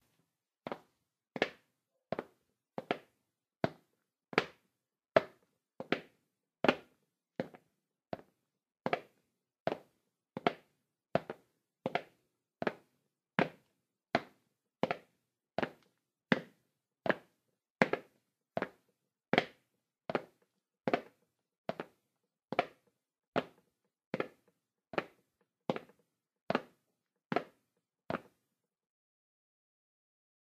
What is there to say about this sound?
STEPS ON WOOD
Sounds of footsteps on a wooden floor.